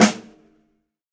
snare shot3
a percussion sample from a recording session using Will Vinton's studio drum set.
hit, percussion, snare, studio